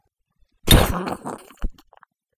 A (in my opinion) fantastic fleshy stabbing sound I made with a screwdriver jammed into mud, noises that came from my mouth, and a macheté scraped against another metal object.
gore, knife, screwdriver, stab